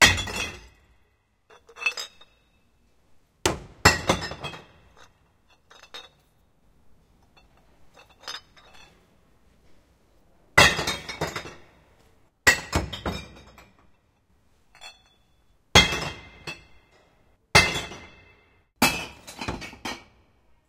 Throwing away glass bottles in a plastic glass trash container in a quiet courtyard.
bottle, bottles, break, breaking, container, echo, echoing, garbage, glass, junk, recycling, shards, shatter, shattered, smash, smashing, throw-glass, throwing-glass, trash